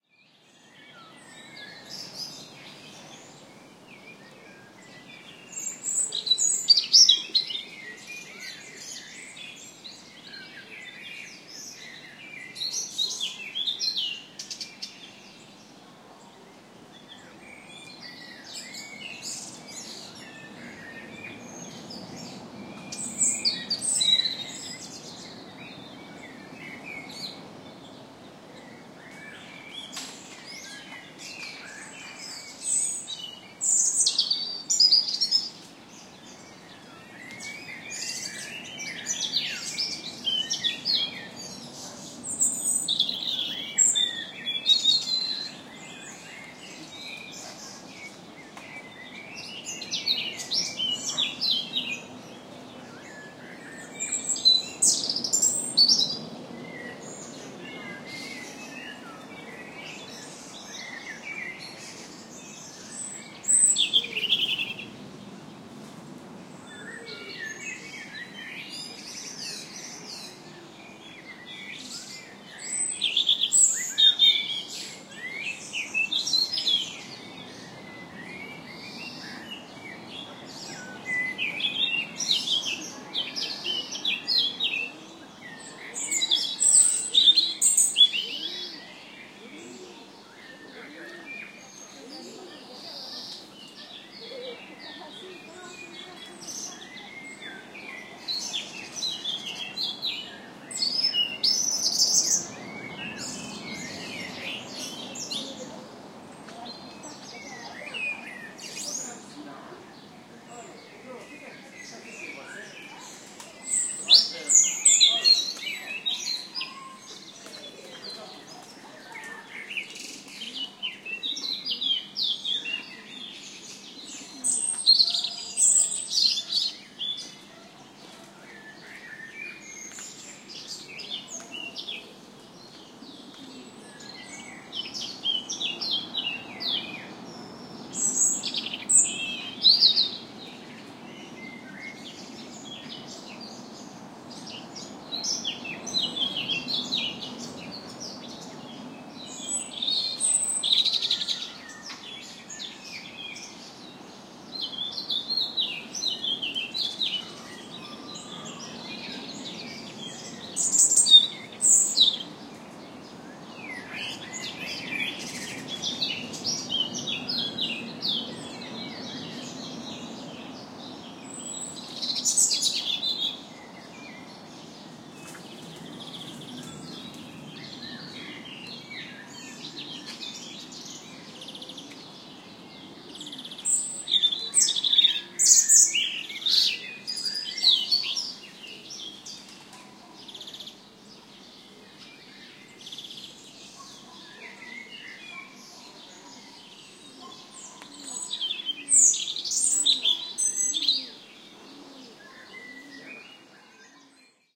20170618 city.garden.robin
Robin singing at close distance, Blackbird singing and city park ambiance in background. Recorded on Duque da Terceira Park (Angra do Heroismo, Azores, Portugal) using a PCM-M10 recorder with internal mics
birds, birdsong, blackbird, field-recording, forest, nature, park, robin, spring